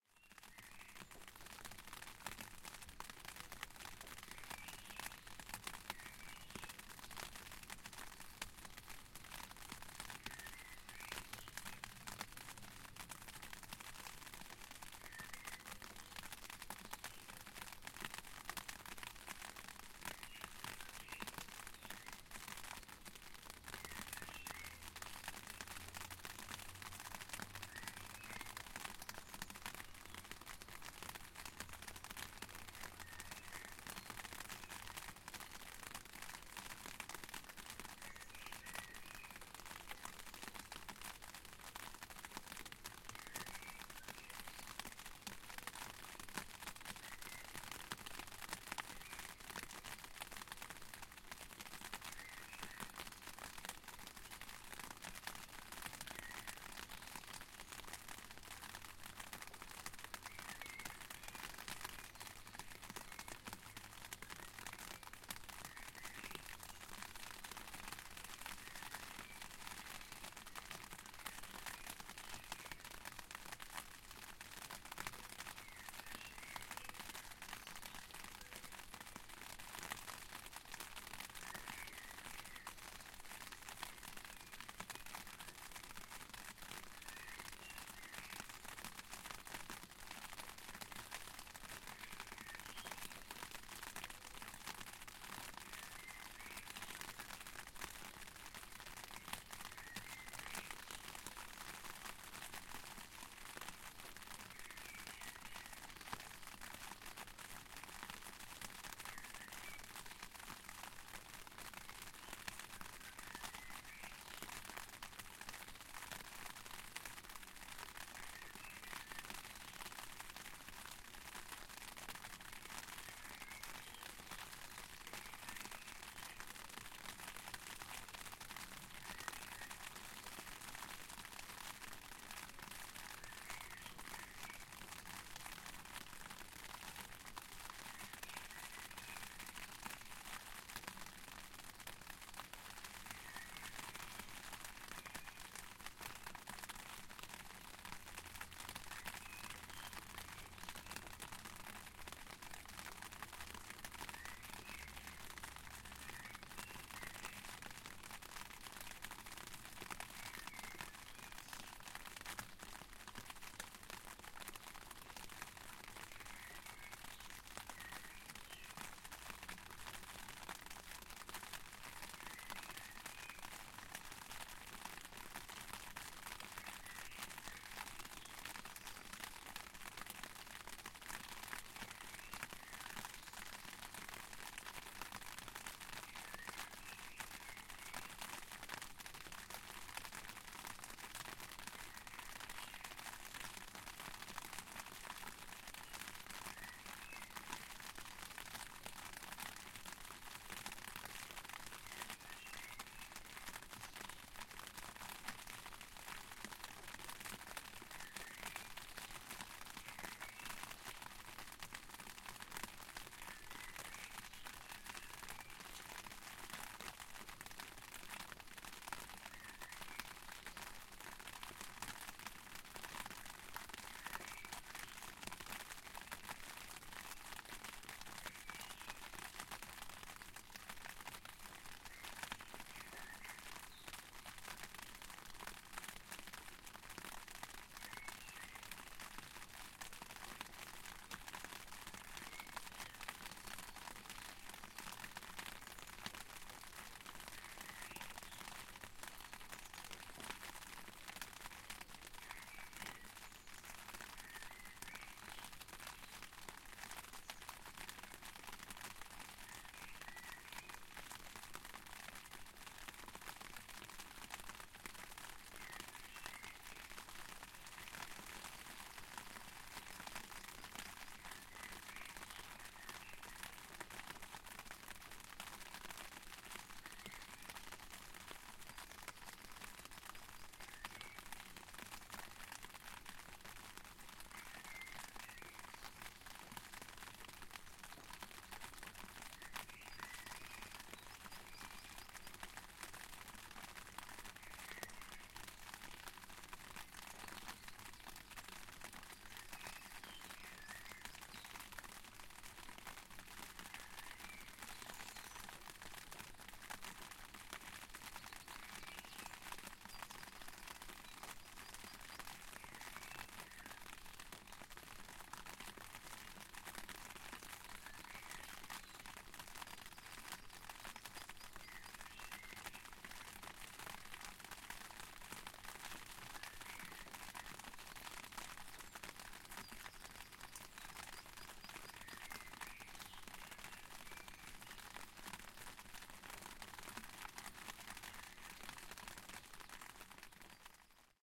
Rain on an umbrella & birds ambience for relaxation..
ambiance, ambience, ambient, atmosphere, field-recording, nature, rain, soundscape, umbrella, water, weather